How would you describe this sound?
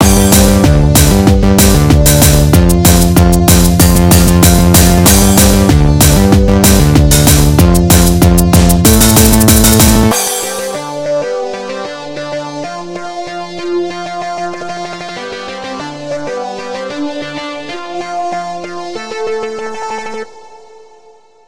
Created in LMMS. Invinciblity. Enjoy in your movie/presentation/etc.